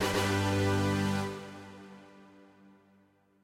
A short fanfare to play when a task is finished successfully. 1 of 3